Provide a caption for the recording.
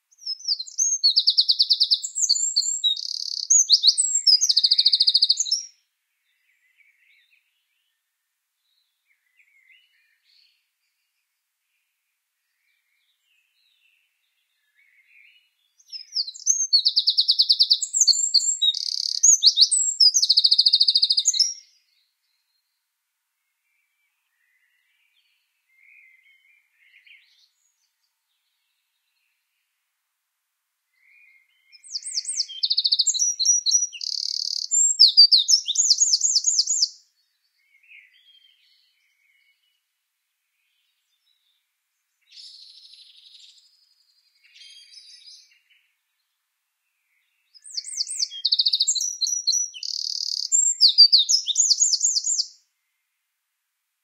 Wren Birdsong
A wren (the same one I presume) has been waking me up at dawn each morning in the springtime with this virtuosic song for the past four years. Its concert season normally begins in late March/April and winds down in late May.
Recorded using a Sony PCM D100.
bird, birds, bird-song, birdsong, dawn-chorus, nature, spring, wren